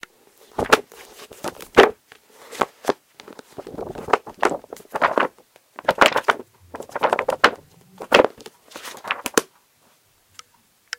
Tossing a paperback book around and flapping the pages. This can be layered to sound like many books are being rustled around or dumped out of a box.